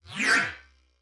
Teleport Slurp
Teleport with a space slurpee feel
fi, button